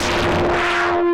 sherman shot bomb33 atmosphere sweep drive
I did some experimental jam with a Sherman Filterbank 2. I had a constant (sine wave i think) signal going into 'signal in' an a percussive sound into 'FM'. Than cutting, cuttin, cuttin...
analog, analouge, artificial, atmosphere, blast, bomb, deep, drive, filterbank, hard, harsh, massive, perc, percussion, sherman, shot, sweep